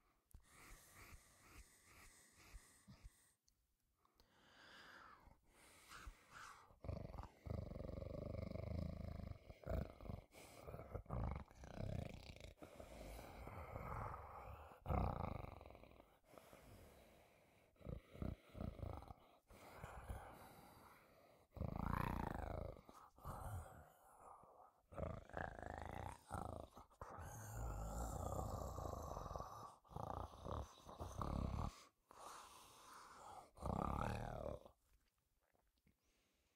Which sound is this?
MONSTER BREATH 2
Heavy breathing
MKH 416